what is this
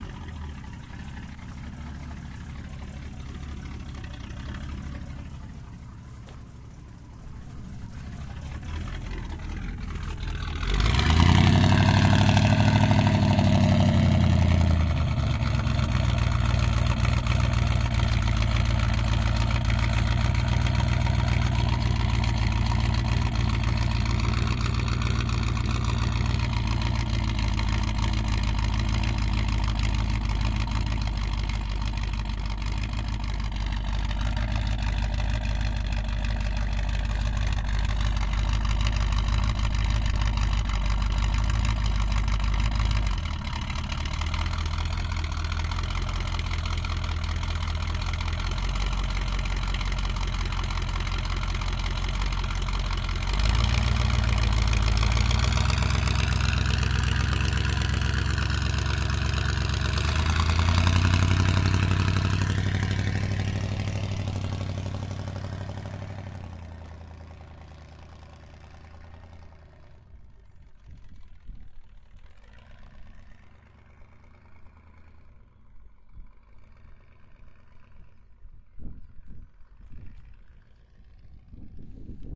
Chevrolet Caprice (motor at different speeds & driving away)
Chevrolet Caprice from a few meters away, then changed levels, went closer. Engine accellerates, then accellerates again and drives away.
Recorded for a teaser of a music video for a simple overlay.
Comment me the links when you use this, I'm excited to know!
I used this sound in those two commissioned clips:
auto, caprice, car, chevrolet, drive, driving, engine, motor, muscle, pipe, revving, roar, straight, v8